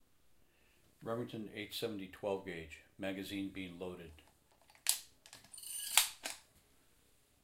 Shotgun Being Loaded2
Loading a shotgun